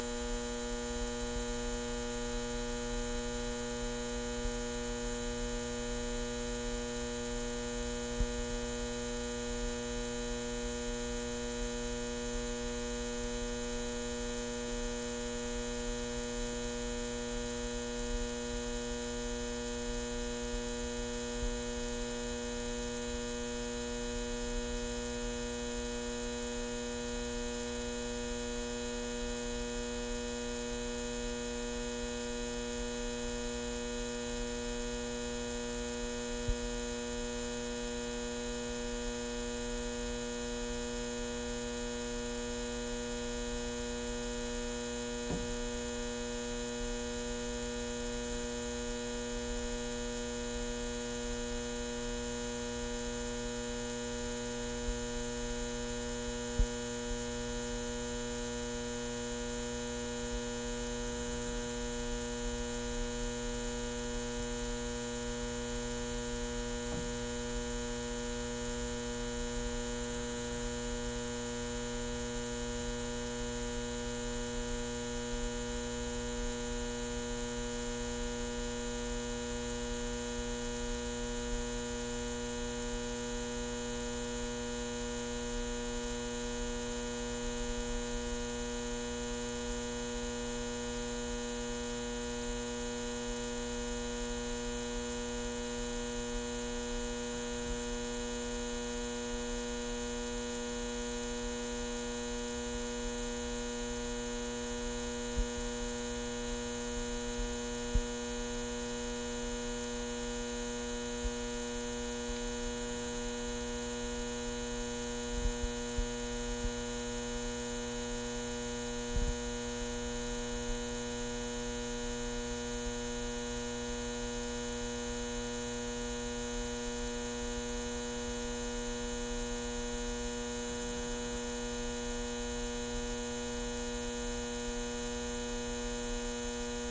neon sign buzz hum stereo close lowcut to taste2
neon, sign, lowcut, stereo, taste, hum, buzz, close